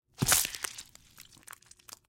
Fleshy Impale Bone Break with Gut Dropping
Took some sounds from a fruit and veggie session in class and built this little SFX.
blood, bone, bones, break, crack, crunch, effects, flesh, gore, horror, horror-effects, horror-fx, leg, limbs, squelch, torso